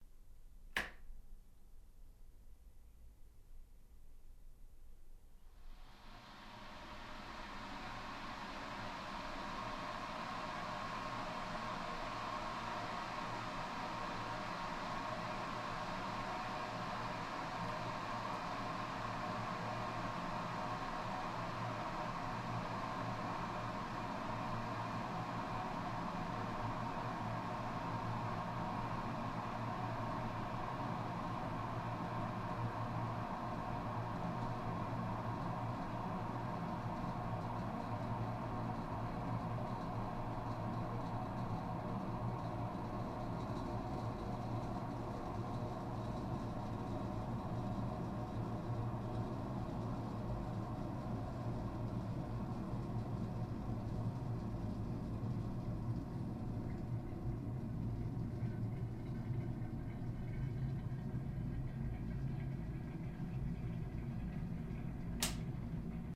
kettle J monaural kitchen
Recordings of kettles boiling in a simulated kitchen in the acoustics laboratories at the University of Salford. From turning kettle on to cut-off when kettle is boiled. The pack contains 10 different kettles.
acoustic-laboratory, high-quality, kettle